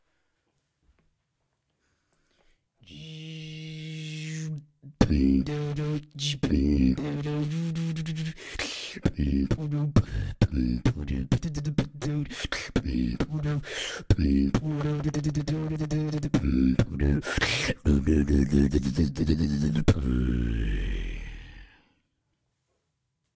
A bass beat of me Beatboxing
bass, dark, low, drum, hard, vocal, Beatbox, beat, Kick